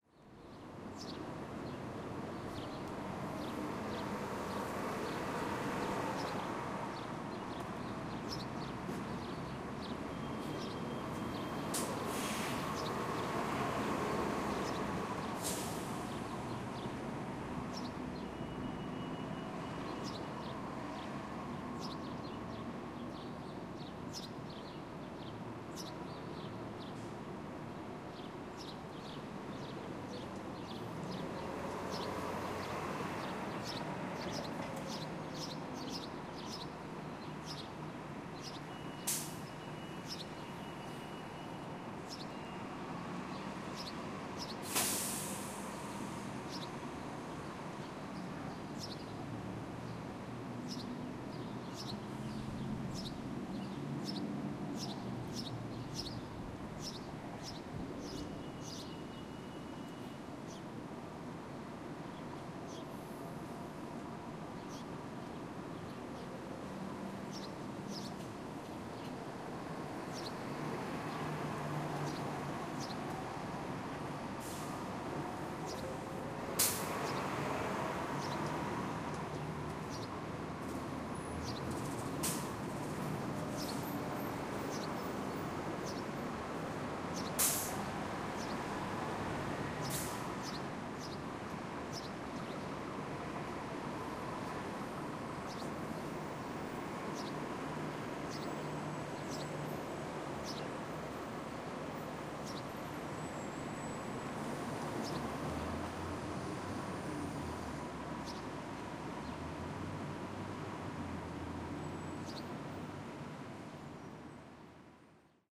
buses leaving station
Buses leaving a city bus station on a breezy day
air-brakes, ambience, bus, buses, departure, depot, engine, field-recording, mass-transit, noise, traffic, transportation